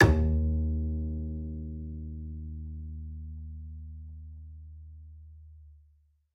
Standup Bass Stop Bartok D#2
This standup bass was sampled using a direct pickup as well as stereo overhead mics for some room ambience. Articulations include a normal pizzicato, or finger plucked note; a stopped note as performed with the finger; a stopped note performed Bartok style; and some miscellaneous sound effects: a slide by the hand down the strings, a slap on the strings, and a knock on the wooden body of the bass. Do enjoy; feedback is welcome!
Acoustic, Plucked, Stereo